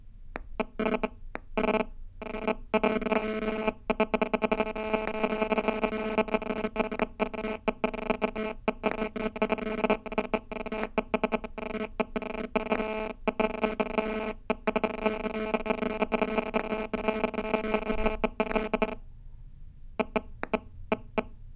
MobilePhone MagneticInt PartII
Second section of an Iphone 4 cycling while attached to a dock, (some kind of unshielded Sony alarm/personal stereo). Recorded with Edirol R-05. Some distortion. Unprocessed. Hotel room ambience. Full version is posted as well.